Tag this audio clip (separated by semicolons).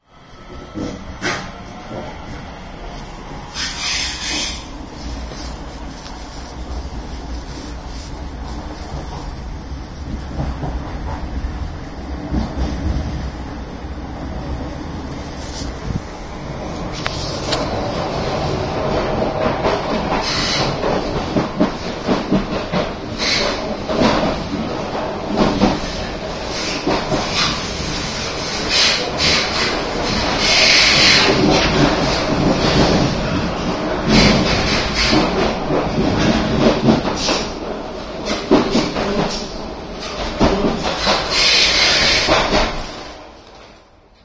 DART; Field-recording; phone-recording; trains; transport